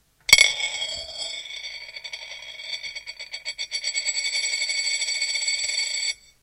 Coins from some countries spin on a plate. Interesting to see the differences.
This on was an English 1 penny